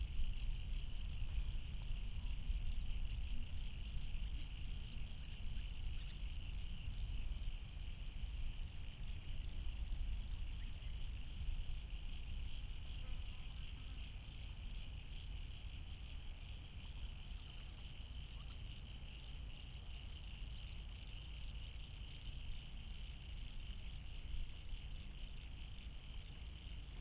recorded on a sunny winter's day in upper colo nsw. recorded on a marantz pmd620